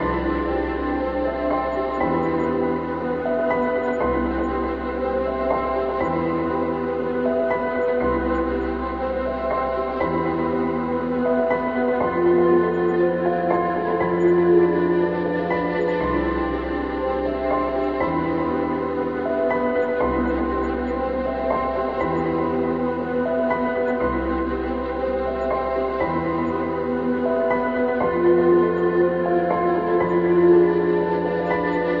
Solar winds (Perfect loop, smaller size)
A very mellow and ambient music loop. Completely seamless. It has a horrid start because of the whole seamless loop stuff, please read further down.
The piano piece is one of josefpres' many beautiful looping piano melodies. I added some effects and an ambient mellotron using the free, but stunning RedTron SE VST instrument, which uses recorded samples of a real mellotron. Highly recommended if you are looking for an authentic mellotron sound.
Please excuse the rough start, the reason why it sounds so weird is because it is a Prefect Loop, that means that everything, including all the effects, loops perfectly. What you hear in the very start is the reverb and delay effects from the end carrying over to the start. It will sound completely seamless once looped!
I highly recommend fading it in because of the weird beginning, wherever you decide to use it, if it is in a game, a video or whatever.